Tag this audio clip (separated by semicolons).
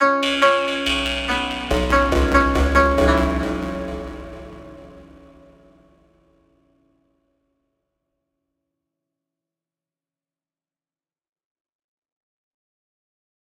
chinese; strings